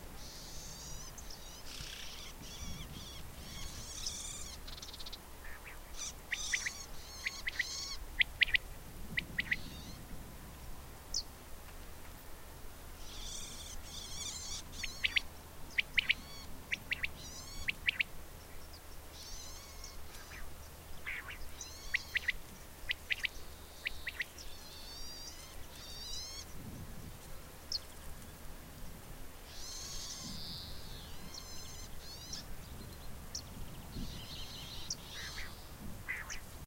20060628.ambiance.scrub.elpeladillo02
morning ambiance in scrub including several bird species. Sennheiser ME66 > Shure FP24 > iRiver H120 (rockbox) /ambiente por la mañana en el matorral, con varias especies de pajaros
scrub, nature, field-recording, quail, donana, summer, birds, warblers